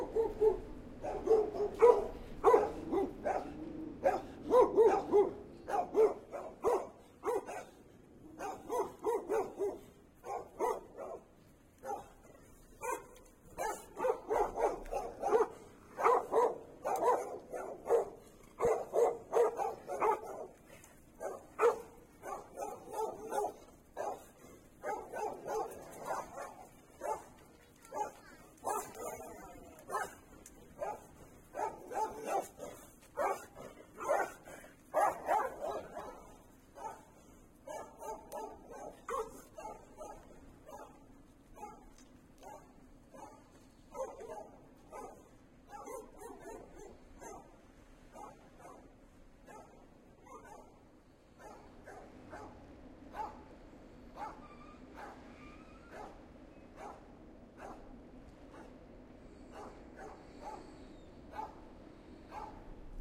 City Dogs - Ambience (night) | Stereo MS
24, ambiance, ambience, ambient, atmosphere, bit, city, dogs, field-recording, ms, night, river, soundscape, stereo, urban, waves